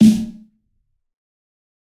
fat snare of god 021
This is a realistic snare I've made mixing various sounds. This time it sounds fatter
realistic, god, drum, fat, kit, snare